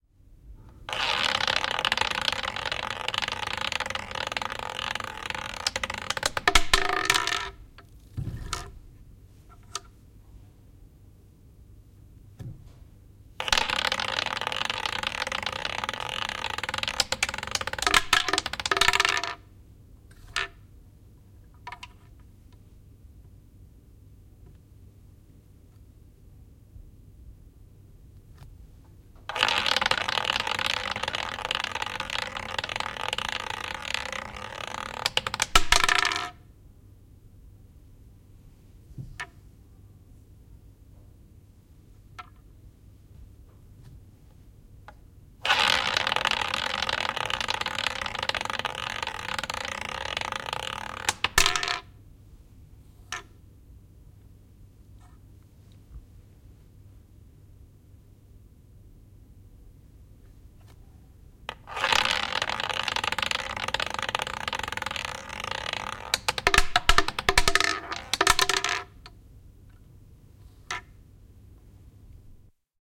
Ruletti pyörii muutaman kerran. Lähiääni.
Paikka/Place: Suomi / Finland / Helsinki, RAY
Aika/Date: 19.10.1987